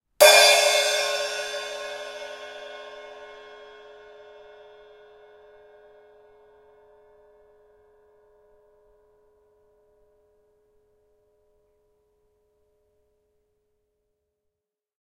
Cymbal, 14'', Hard Hit, B (H1)
Raw audio of a 14-inch Zildjian cymbal being struck forcefully with a metal mallet. I recorded this simultaneously with the Zoom H1 and Zoom H4n Pro recorders to compare their quality. The recorders were just over a meter away from the cymbal.
An example of how you might credit is by putting this in the description/credits:
The sound was recorded using a "H1 Zoom recorder" on 31st October 2017.